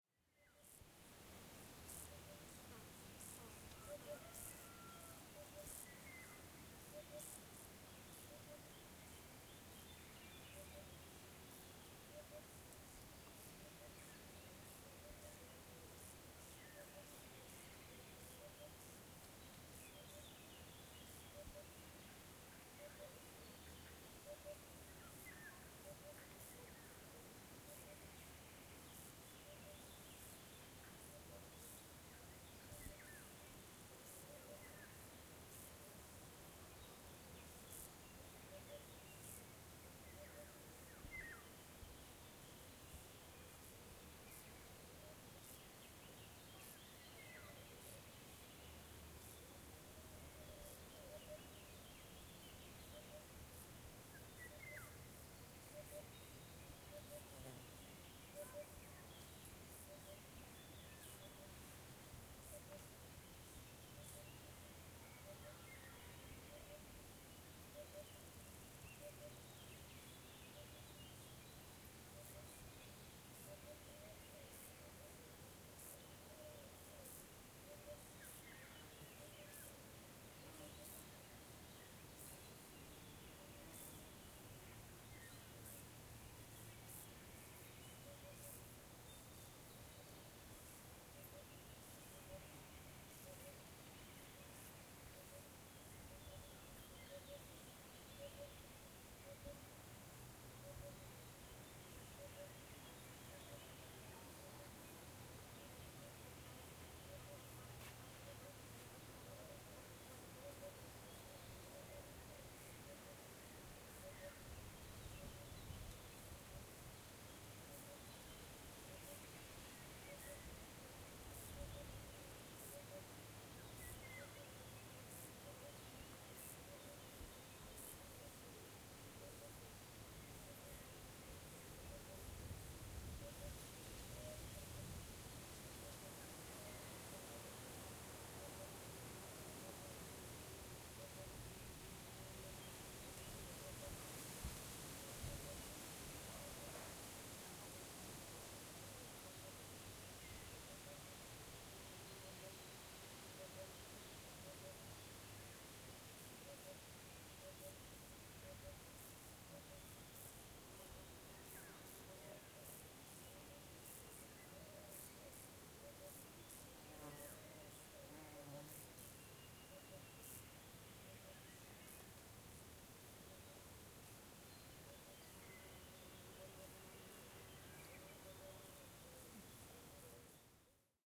Forest Ambience 1
A Simple forest Ambience recorded in Monte Gelato (Italy) during summer, using a Zoom H4n.